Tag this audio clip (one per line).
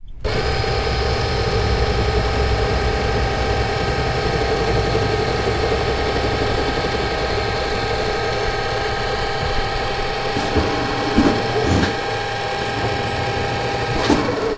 field-recording
folder
industrial
machine